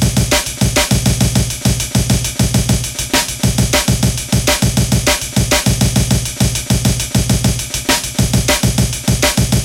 amen loop 2
amen, drum, loop